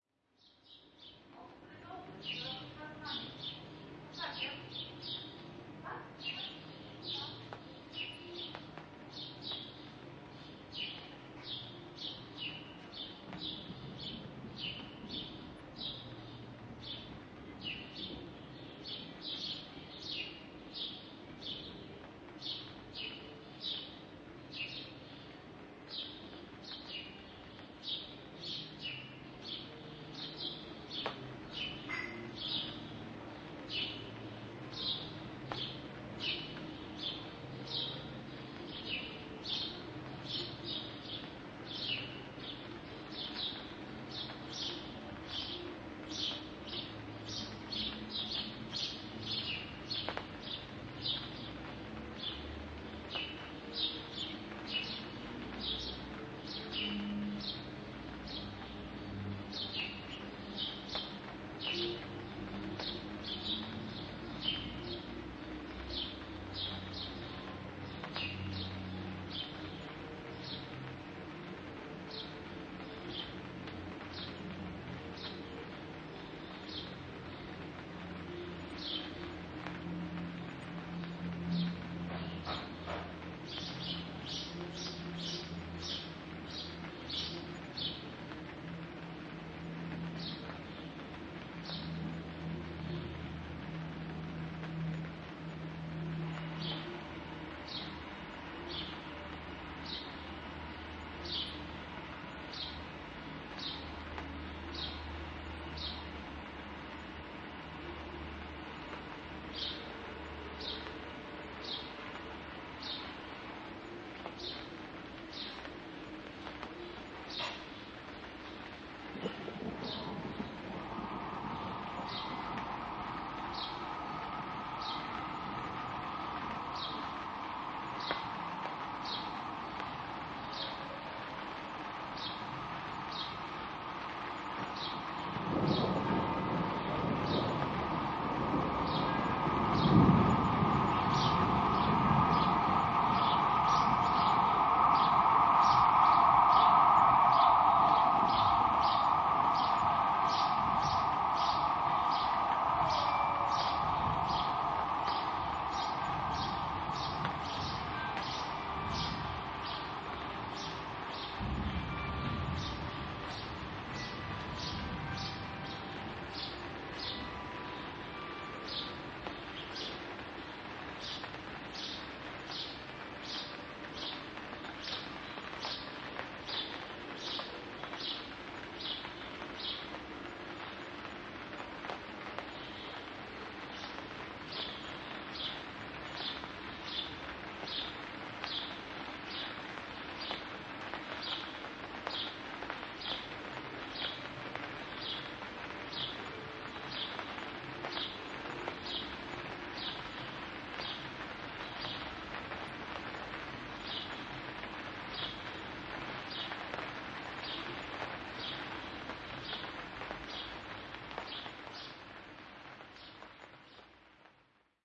30.05.2013: 15.00, courtyard of the restaurant Pracownia on Wozna street in the center of Poznan in Poland. Ambiance. Sounds of rain, drawing on thunder, chiming of the town hall clock, distant sound of traffic, singing birds.
Marantz PDM661+ shure vp88
birds, rain, thunder, center, Poland, cars, courtyard, fieldrecording, restaurant, traffic, Poznan, clock